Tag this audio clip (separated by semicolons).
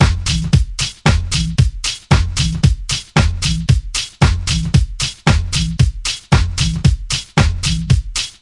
loop; 114bpm; drums